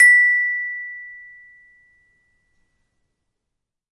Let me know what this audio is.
children instrument toy xylophone
children, toy, instrument, xylophone